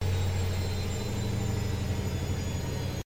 washing machine D (monaural) - Spin 7
washing-machine, high-quality, field-recording